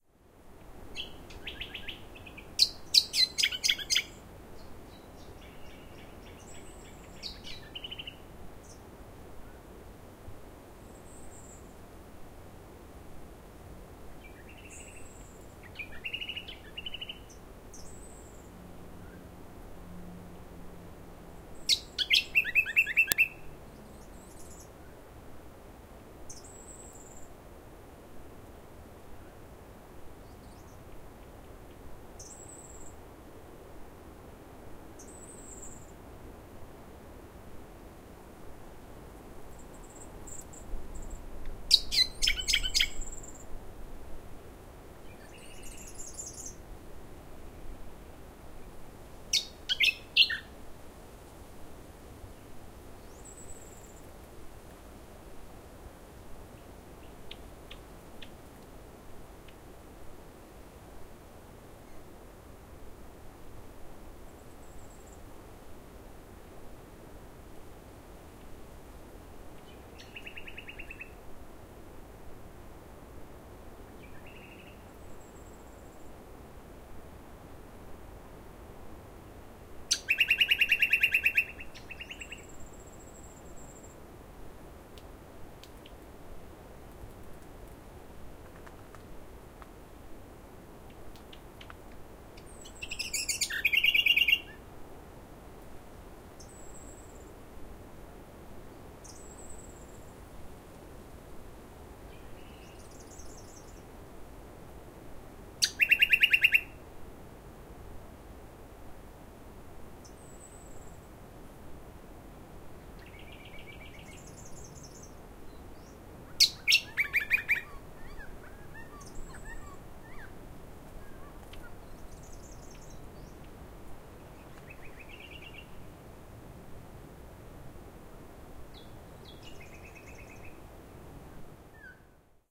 Birds of Noosa Biosphere Reserve
Extraordinarily clear and joyful birdsong. Recorded in Noosa Biosphere Reserve near Noosa, Queensland, Australia in October 2013.
Australia, Noosa, Queensland, Queensland-Australia, bird, birds, birdsong, field-recording, flock, flocks, forest, nature